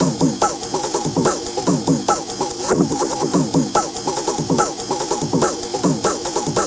Hardbass
Hardstyle
Loops
140 BPM